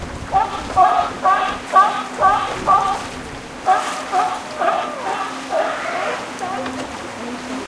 Characteristic Sea-lion call, Recorded from a small boat at Sea of Cortez, Baja California, Mexico. Shure WL183 mics, Fel preamp, Olympus LS10 recorder
field-recording, otarid, sea-lion, seals